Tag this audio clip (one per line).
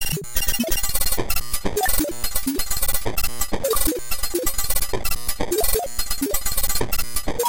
idm
glitch
processed
beat
cymbal
livecut
loop
metal
bell